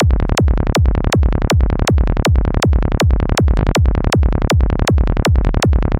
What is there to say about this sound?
DARK kick and bass 160BPM
psytrance kick and bass 160bpm
trance, psy-trance, goatrance, psykick